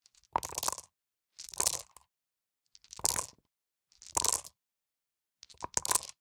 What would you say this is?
The name describes what it is: eg. Dice (3) in cup on table = Three dice are put in a cup which stands on a table.
The sounds were all recorded by me and were to be used in a video game, but I don't think they were ever used, so here they are. Take them! Use them!